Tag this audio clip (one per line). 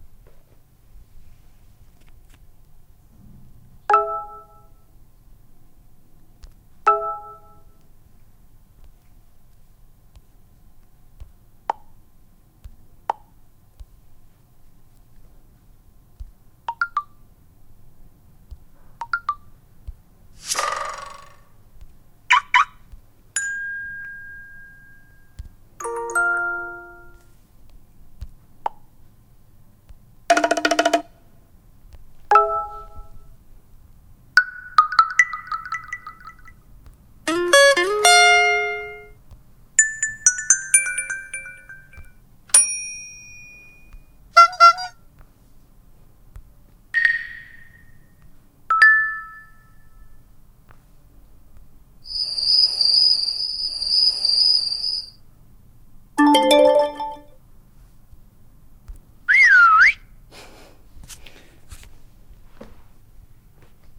ringtone
sms
mobile
cell-phone
alert
message
phone
cell
ring-tone
cellphone
text